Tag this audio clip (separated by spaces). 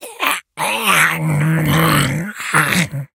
indiedev creature sfx